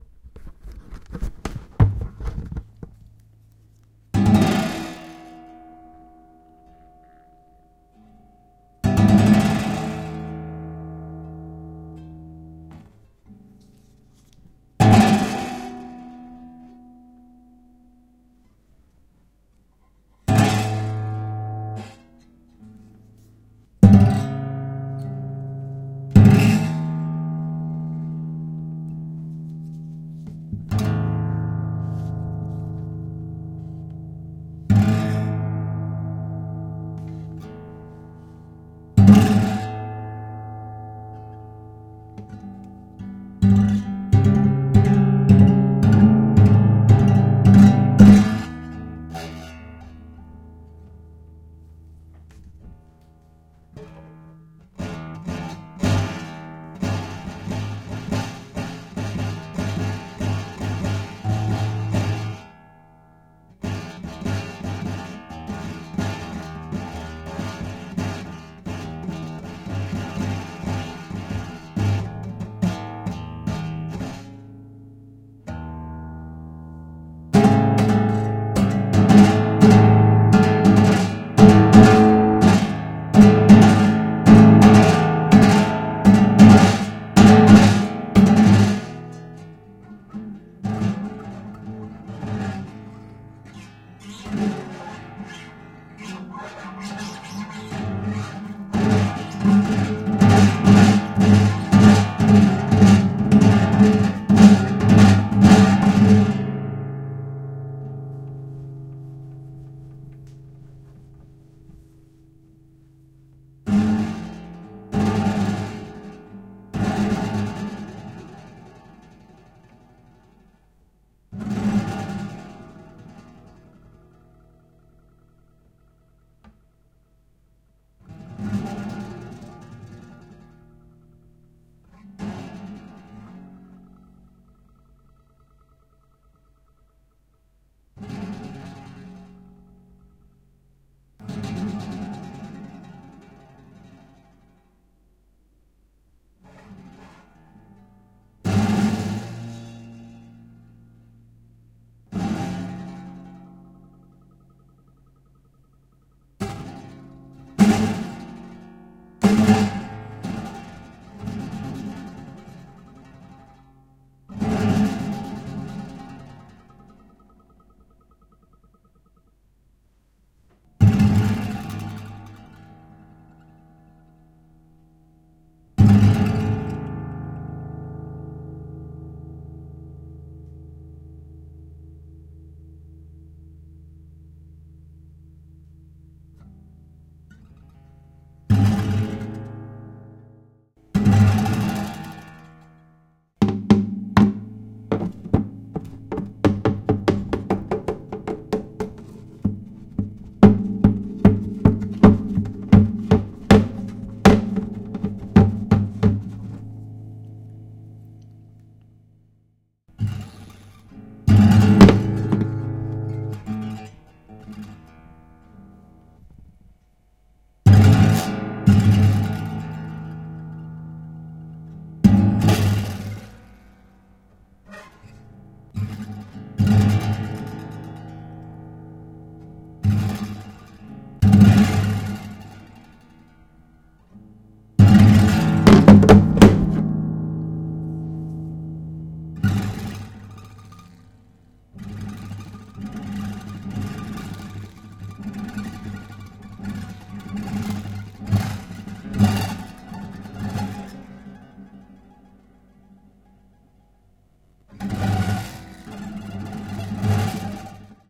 Guitar Experiment
Dropping smartphones on the strings of a guitar. Also rubbing them on it.
Recorded with Zoom H2. Edited with Audacity.
experiment, experimental, guitar, instrument, musical, string